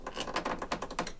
A sound of a creack on a wooden floor recorded on set for a short film.
This is one of the many, so check out the 'Creacks' pack if you need more different creaks.
Used Sony PCM-D50.